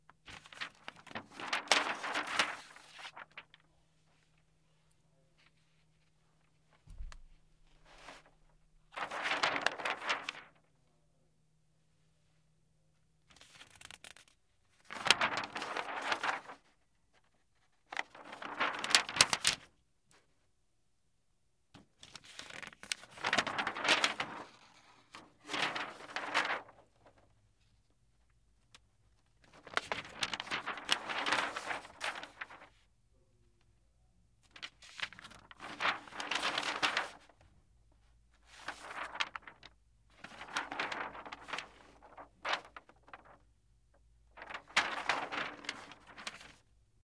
folding paper
The sound of a flip chart turning to the next page. Done several times over.
bond, calendar, chart, flip, flipchart, fold, heavy, large, page, paper, rustle, turn